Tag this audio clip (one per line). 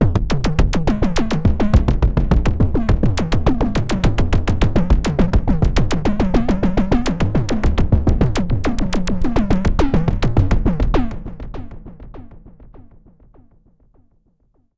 multi-sample
waldorf
loop
electronic
130bpm
synth
arpeggio